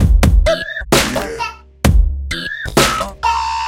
Hiphop/beats made with flstudio12/reaktor/omnisphere2
130bpm, beat, drum, drumloop, glitch, hip, hop, loop, pack, trip